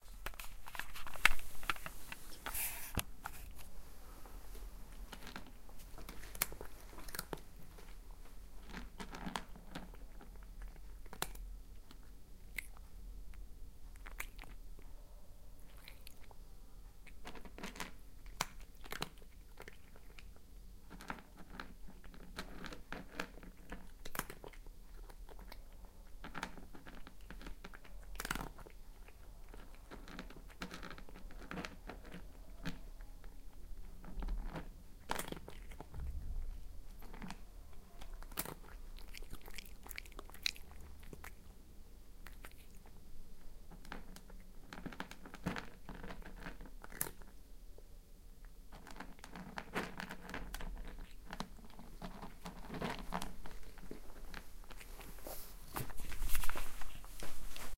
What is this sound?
My cat Athos while eating dry food
animal, cat, dry, eating, food, pet